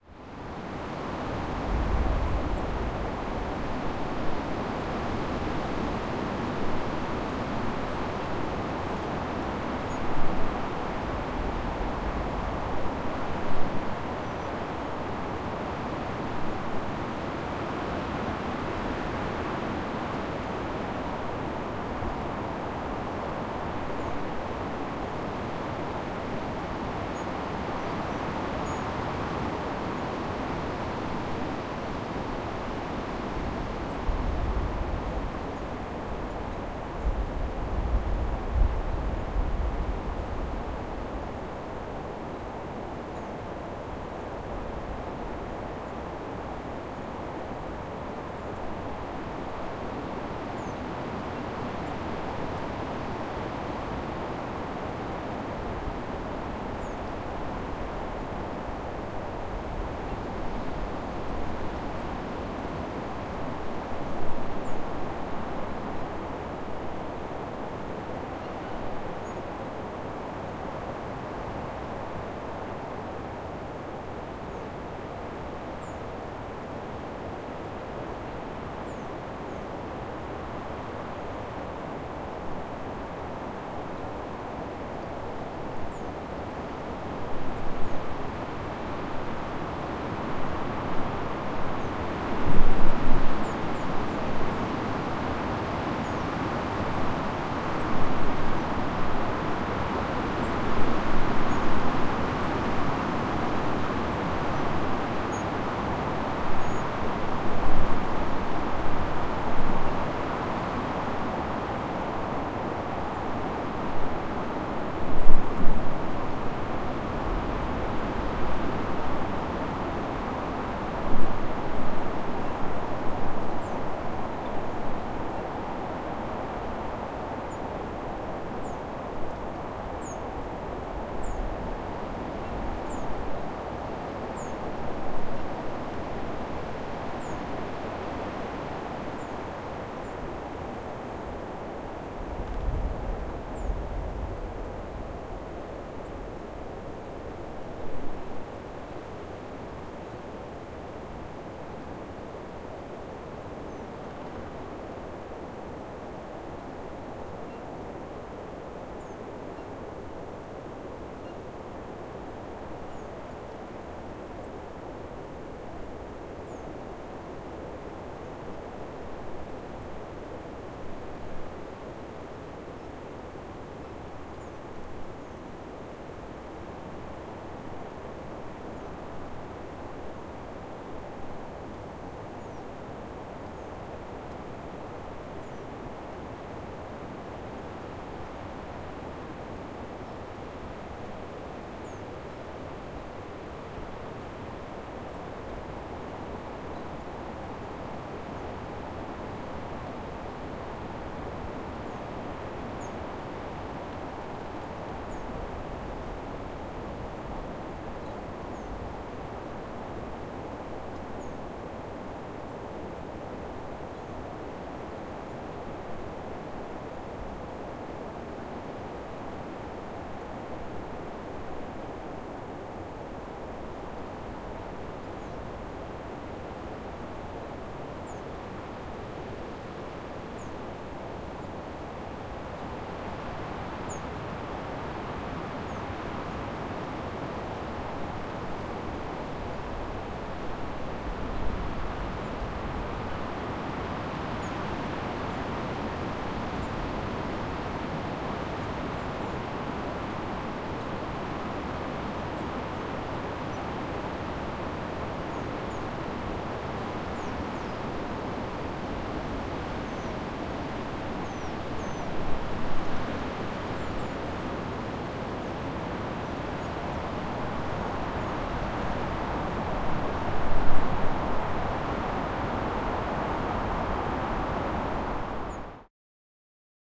Captured in a small she-oak forest on the edge of Pink Lakes, a salt lake in Murray Sunset National Park , Victoria Australia. The wind sings beautifully through these trees.
Recorded with a MS set up using a Sennheiser 416 paired with Sennheiser Mkh-70 into a Zoom H4n. I then synced this up with another recording from a different position using a Zoom 4n.